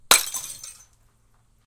Bottle Smash FF159
1 load quick beer bottle smash, hammer, liquid-filled
liquid-filled, bottle-smash, bottle-breaking, loud